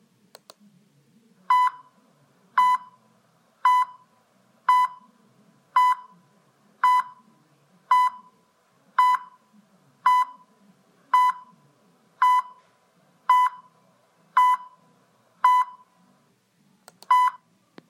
Sound of a monitor